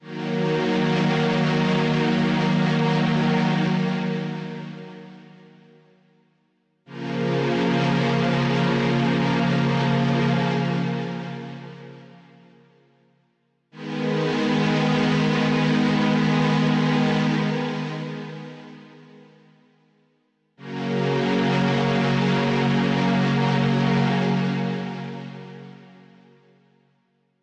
Breath Voices
Classic Malstrom patch from Reason 3, distorted for further 'analog' effect. Sequenced at 140bpm.
atmospheric, pad, synthesizer